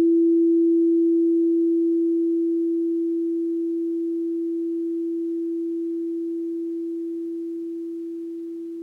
Aud 12 inch crystal bowl pure tone
12 inch crystal bowl pure tone
relaxation, meditation, meditative, crystal, bowl, relaxing, relax